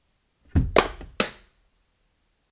Book Drop
this is the sound of a book being dropped
book, paper, OWI, dropped